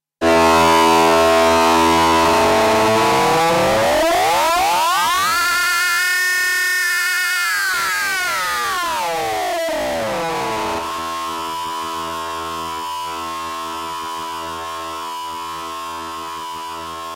Pitched frequnce modulated sound with dist. Made from a ATC-X synth with dist circuit at work.
sound-effect, analog-fm, distortion, fm, frequence-modulation, pitched